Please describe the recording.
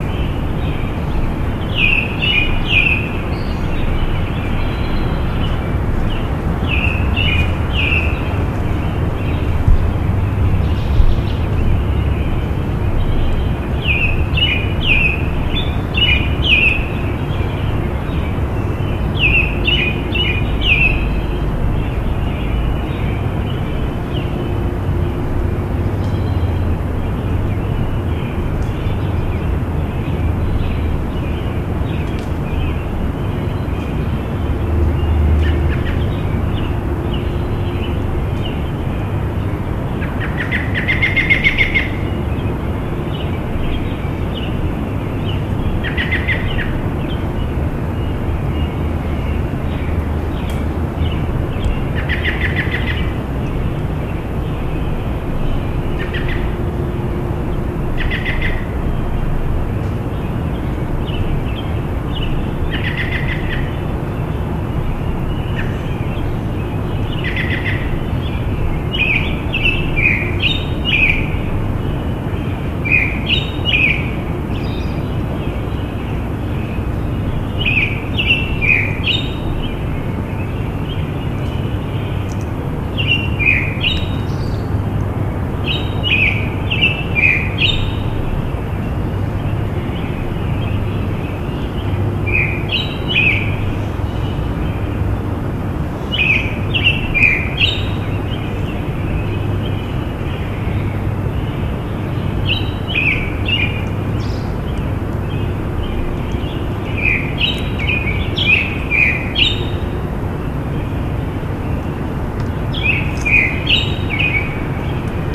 morning birds
birds chirping at 4am